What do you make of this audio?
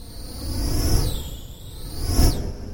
hmmmm, pulse wave setting..... and envelope's. w00t.
space, flyby, whoosh, synth, micron, sfx